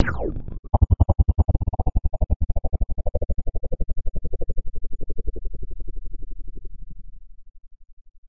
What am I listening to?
pistol pew
fictitious sound FX
fictitious, FX, sound